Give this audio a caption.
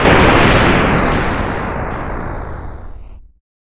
SFX Explosion 16
retro video-game 8-bit explosion
8-bit, explosion, retro